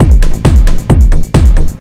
this is made with the legendary rebirth rb-338 from the propellerheads.
rebirth is a 1x dr808,1x dr909 and 2x tb303 emulation of these legendary roland instruments for pc.
you can get a free copy of the program rebirth rb-338 by visiting the rebirth museum site.
(after registering u get the original downloadfile on their webpage for free)
so best wishes and friendly greetings from berlin-city,germany!
303, 338, 808, 909, drum, drumloop, drums, electro, loop, rebirth, roland, sequence, tekno, trance